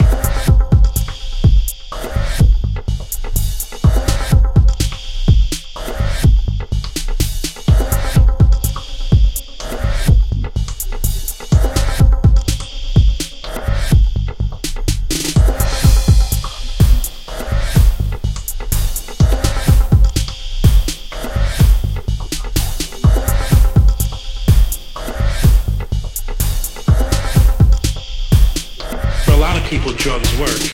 Drugs Work Glitch 125bpm 16 Bars
Glitch loop with sample
mixes, loops